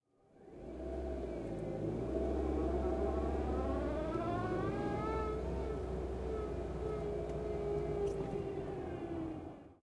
Car ReverseWhine 3
I spent an hour today looking for a decent car reversing sound, gave up and recorded my car doing the same. I was in search of that particular whine you get when you back up in a car or truck rather quickly. Tried to avoid engine sound/ Recorded on my trusty old Zoom H4 and processed (EQ - cut the lows, Compression to bring up the level mostly) in ProTools 10.
99, backing, Car, interior, mazda, protege, reverse, reversing, Standard, Transmission, up, whine